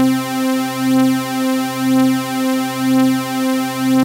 Synth Orchestra 1
This is a sort-of "synthesizer string ensemble" sort of sound, made by combining some of my synth samples.